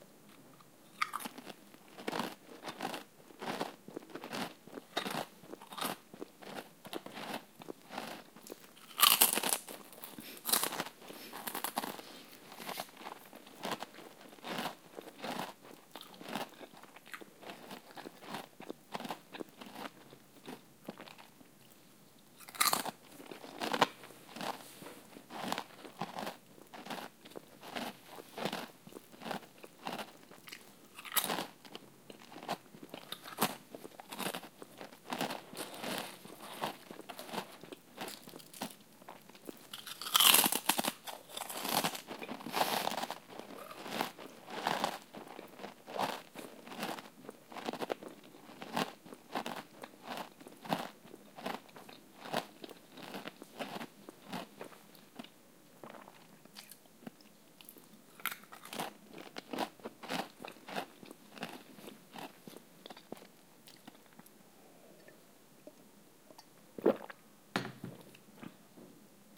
Chips Eating Crunching Binaural Sounds
Try eating something softer while listening to this. It's a mindfuck. The brain is telling that the food is soft and crunchy at the same time. Swallowing is also difficult since it probably relies on sound more than tactile tongue signals with the food.